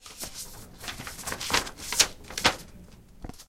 You can hear as someone is turning pages. It has been recorded at the library at Pompeu Fabra University.